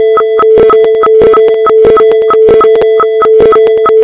MONTECOT Mélissa 2015 2016 sonar
This sound represent a sonar of submarine approaching an enemy, it can be used in a war movie or even in a video game by the very synthetic sound of this track.
For this, i created a sound frequency 440, amplitude 0.8 then, i used an increase of the envelope of this sound in several places to create a sharp sound corresponding to the detection of the enemy. I also added a reverberation because we are in a submarine and to finish, i accelerated the speed to announce that he is near and i duplicate this part many times.
enemy, bip, signal, submarines, war, underwater, radar, marins, sonar